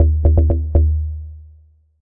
Tribal Drum 1
Tribal Bass Drum Alert
Asset, Percussive, Tropical, Virtual-instrument